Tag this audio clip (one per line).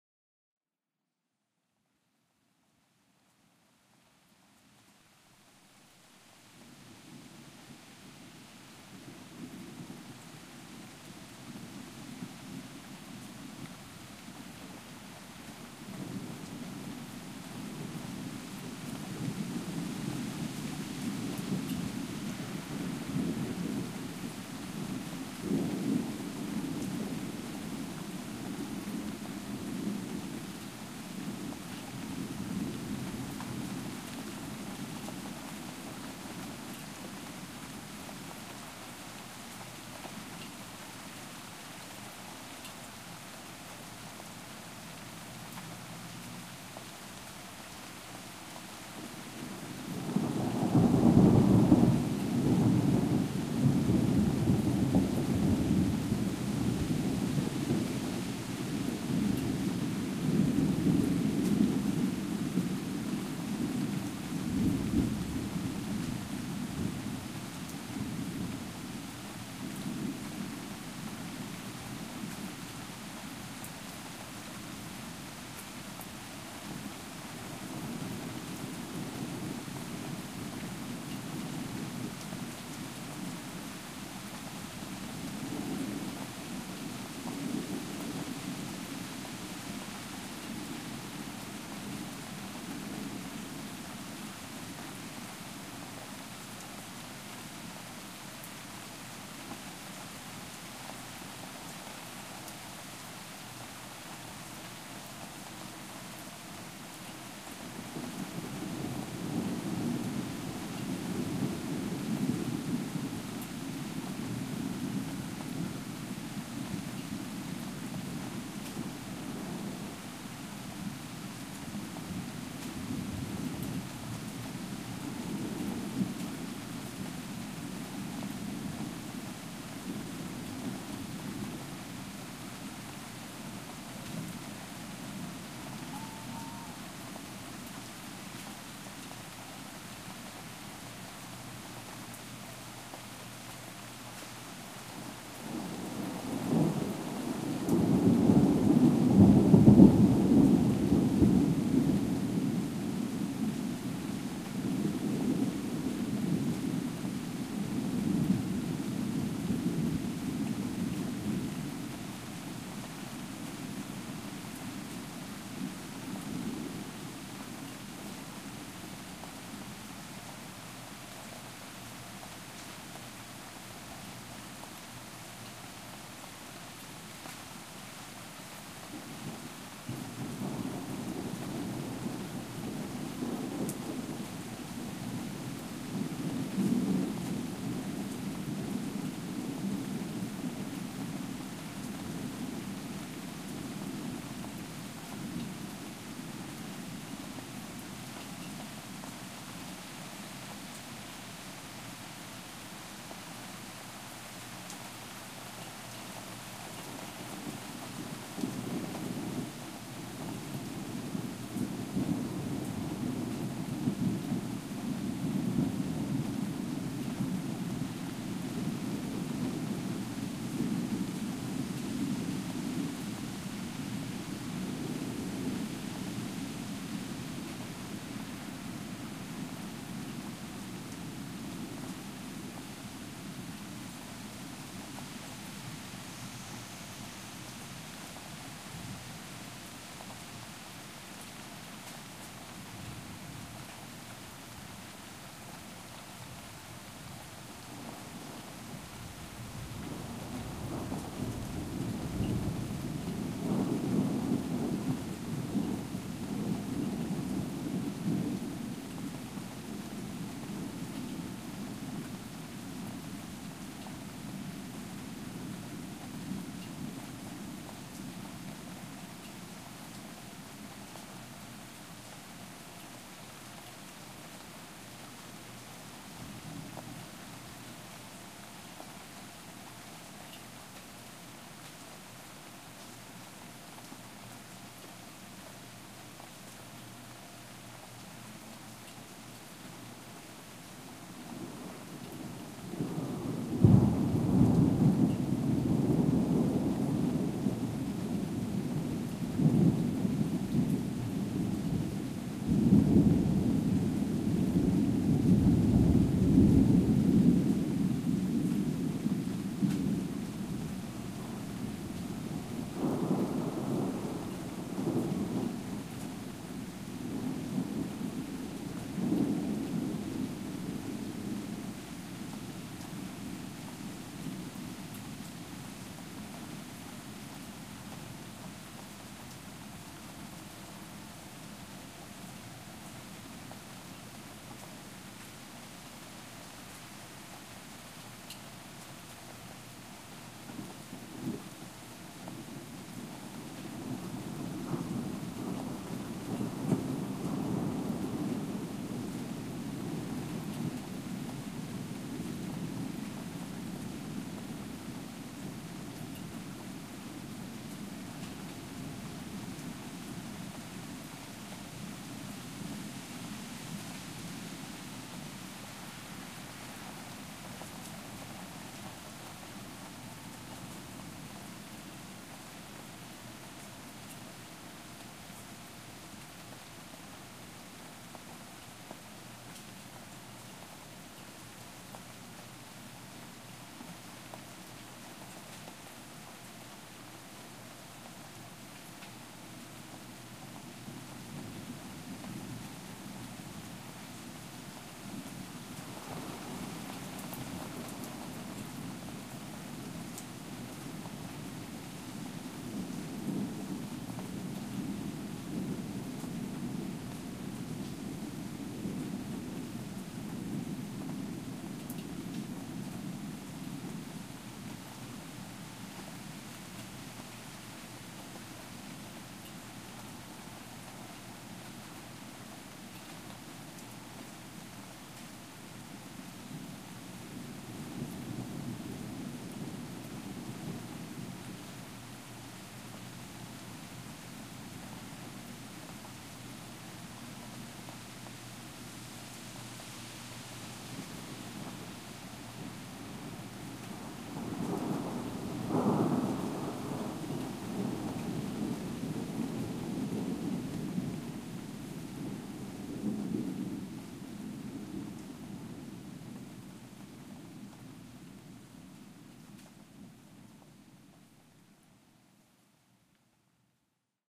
ambience
ambient
field-recording
lightning
nature
outdoor
rain
rainstorm
storm
thunder
thunderstorm
weather
wind